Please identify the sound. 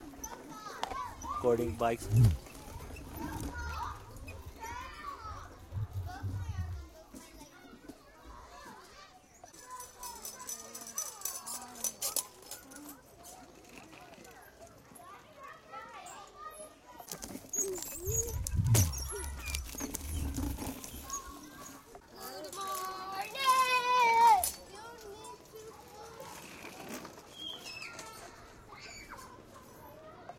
mySounds GWAEtoy small bikes
From the playground
field, playground, recording, school